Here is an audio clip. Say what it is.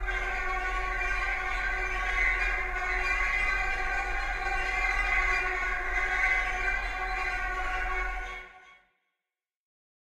cello cluster 3
Violoncello SFX Recorded
Cello, Bell, Violoncello, Cluster, Hit